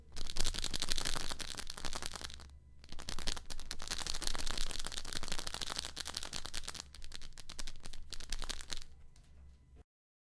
Pills in Bottle Closed
Pills shaking in a closed bottle.
bottle, closed, pills